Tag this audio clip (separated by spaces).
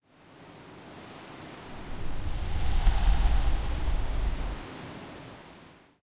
alert call cell cellphone message mobile phone ring UEM